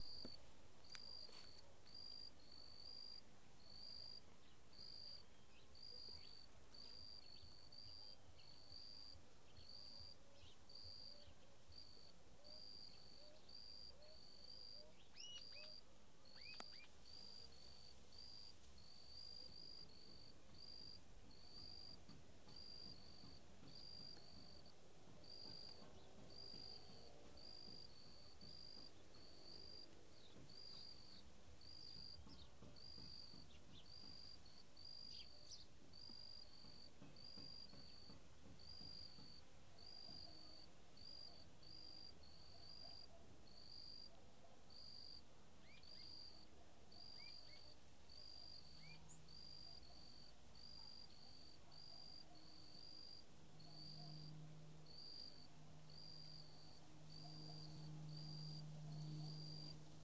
Grilos em um brejo de uma fazenda, na parte da manhã.
"Crickets on marsh"
Gravado com celular Samsung galaxy usando o App "Tape Machine Lite".
(Recorded with Samsung Galaxy using "Tape Machine" App for Android)
16 bit
Mono
fazenda, farm, birds, Tape-machine-android, nature, grilos, Brasil, mato, floresta-tropical, marsh, brejo, ambiance, crickets, field-recording, insects